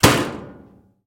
Appliance-Clothes Dryer-Door-Slammed Shut-02
The sound of a clothes dryer door being slammed shut.
Appliance, Close, Clothes-Dryer, Crash, Door, Dryer, Metal, Slam